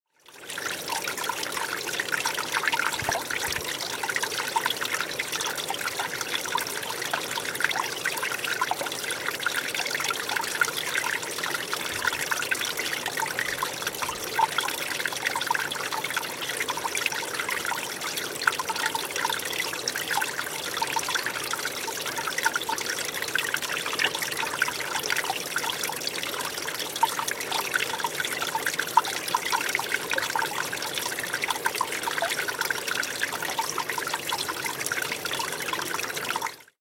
Welsh Stream 02
A recording of a stream found in Dolgellau, Wales.
Captured using a Shure MV88
ambient, babbling, brook, bubbling, creek, field-recording, flow, flowing, gurgle, gurgling, liquid, relaxing, river, splash, stream, trickle, water